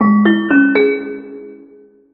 A simple jingle that can be used as an announcement sound for stations or airports, inside trains or busses. Made with MuseScore2.
announcement, bus, public, railroad, railway, sound, station, stations, trains